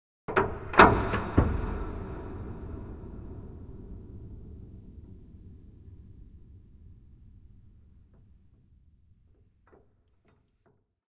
Limbo Opener
sound, switch, small, dark, handle